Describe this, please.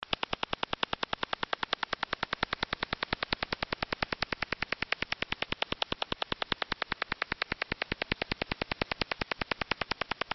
fappy laser
Found while scanning band Radio
noise, Sound-Effects, Broadcast, circuit, radio, media, bending